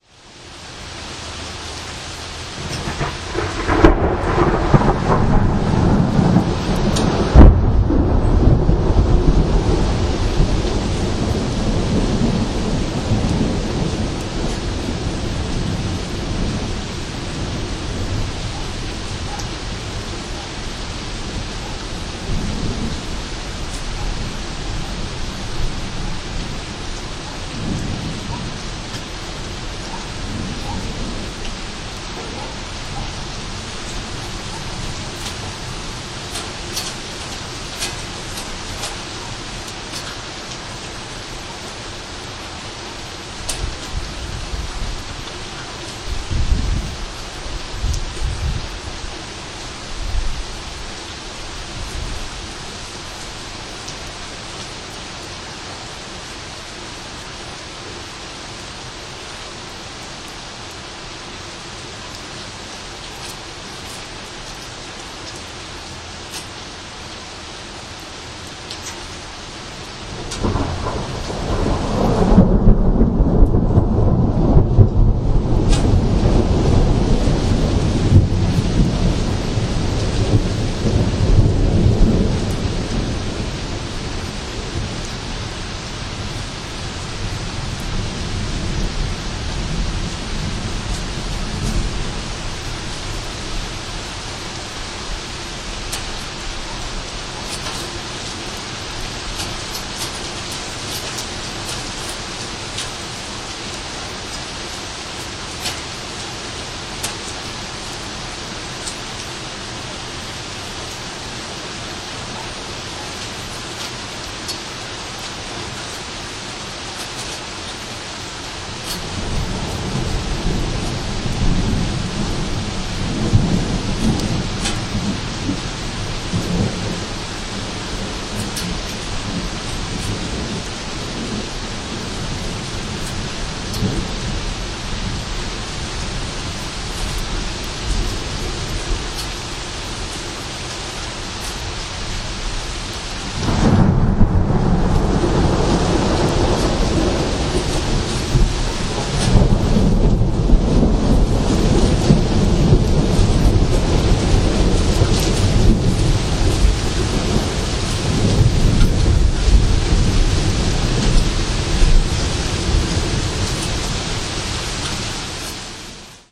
Thunderstorm and rain in the countryside
Excerpt from a violent storm in the south of France, recorded June 8, 2013 at 7:00 PM
rain, rumble, thunderstorm